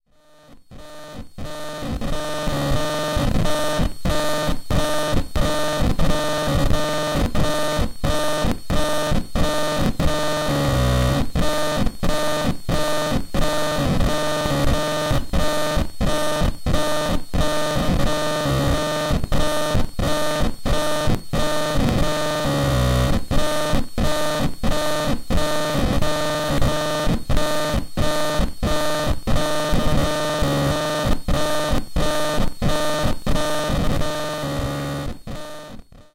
Blips and beeps made on an Alesis micron